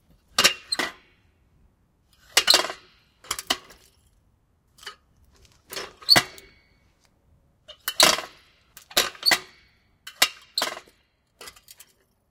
A metal bicycle kickstand (Hinterbauständer in German) being used on a concrete surface in a quiet court yard.
bicycle, bike, concrete, kick, kicking, kickstand, metal, parking, scrape, scraping, secure, stand, standing, stone